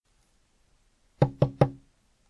violin-body
Tapping my sister's violin body. I recorded this effect for a small game I made:
I used an Olympus VN-541PC and edited on Audacity.